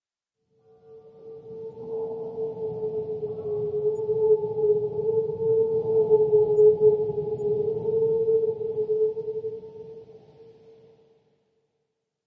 horror effect3
made with vst instruments
drama, suspense, ambience, mood, trailer, dramatic, film, cinematic, horror, thrill, pad, ambient, background-sound, spooky, music, movie, space, soundscape, dark, background, scary, drone, sci-fi, atmosphere, deep, hollywood, thriller